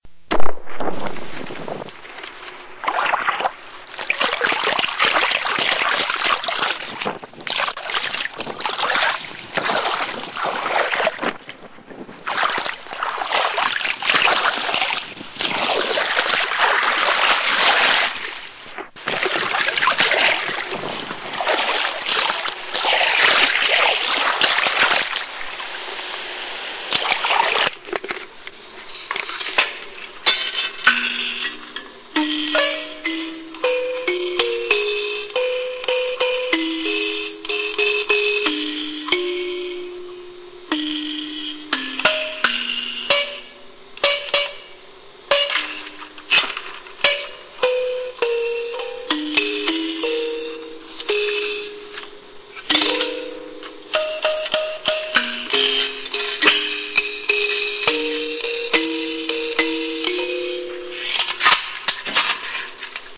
Recording of hand moving in water and plucking of ancient instrument, in 2011.
Recorded on mini-tape recorder